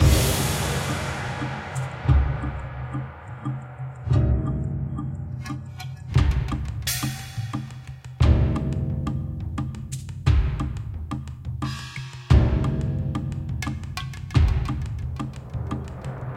This is a Chinese / Eastern percussion loop featuring Kodo drums and a variety of gongs. Also included in the pack is "China-End" which is a final gong hit to bring conclusion to the loop. This would make a great backing track. Enjoy!